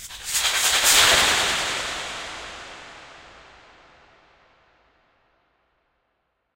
microphone + VST plugins